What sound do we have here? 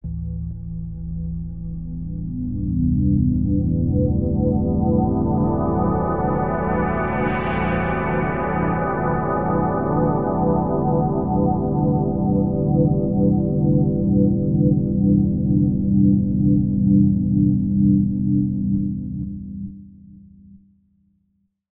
Warm retro synth opening filters